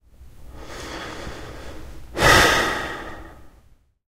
Breathing air in and out. Life is hard. There is no such thing as a free lunch. We're all gonna die someday. Every man for herself. There can be only one.